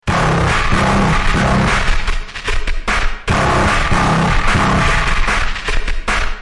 lo-fi recording drumbeat with organic jam